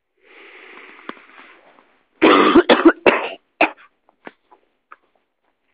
coughing in bed (early morning January 16th of 2009)
cough, coughing, night